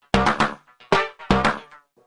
Funky pattern Solo
115, mixed, bassline, bpm, pattern